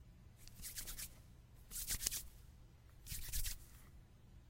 Rubbing hands together.
hand, hands, rub, rubbed, rubbing, skin